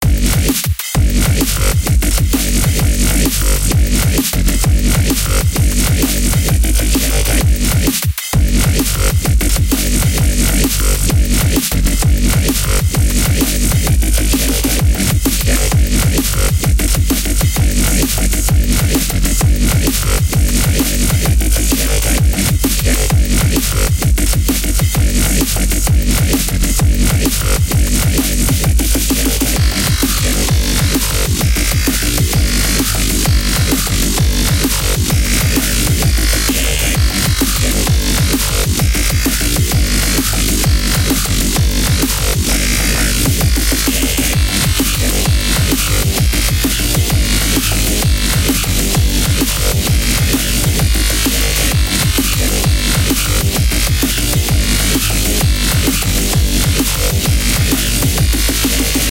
becop w.i.p piece
Part of my becope track, small parts, unused parts, edited and unedited parts.
Made in fl studio and serum.
A short un mastered edit of the track these sounds came from. it's a a poppy galloping beat.
Unfinished as of now, but i will continue to uploads pieces of the track of use if needed.
synth, electronic, bass